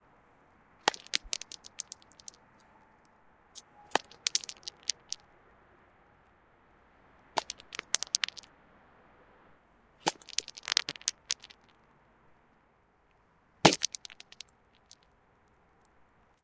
kicking a stone away with a shoe towards the microphone. stereo recording on a street surface
kick, shoe, street